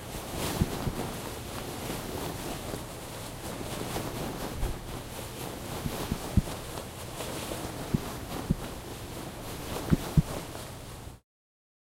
Running in a dress
Running on carpet barefoot in a satin dress
material, movement, clothing, dress, run, satin, running